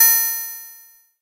16 virus kit d#2
Exotic Electronic Percussion 8